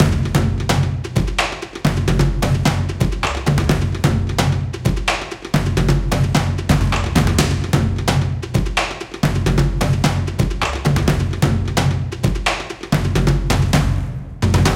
african loop004 bpm130
african, loop, percussion